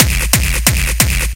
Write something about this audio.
xKicks - Triplets
Im sorry I haven’t been uploading lately… I really can’t give a reason as to why i haven’t been uploading any of the teaser kicks lately, nor have i given any download links for the actual xKicks volumes 1 or 2…
Ive actually finished xKicks volumes 1 - 6, each containing at least 250 unique Distorted, Hardstyle, Gabber, Obscure, Noisy, Nasty kicks, and I’m about to finish xKicks 7 real soon here.
Here are various teasers from xKicks 1 - 6
Do you enjoy hearing incredible hard dance kicks? Introducing the latest instalment of the xKicks Series! xKicks Edition 2 brings you 250 new, unique hard dance kicks that will keep you wanting more. Tweak them out with EQs, add effects to them, trim them to your liking, share your tweaked xKicks sounds.
xKicks is back with an all-new package featuring 250 Brand new, Unique Hard Dance kicks. xKicks Edition 3 features kicks suitable for Gabber, Hardstyle, Jumpstyle and any other harsh, raw sound.
Add EQ, Trim them, Add Effects, Change their Pitch.
hard, kick-drum, beat, noisy, dirty, distortion, extreme, xKicks, jumpstyle, hardstyle, hardcore, single-hit, bass, gabber, distorted, kick, drum, obscure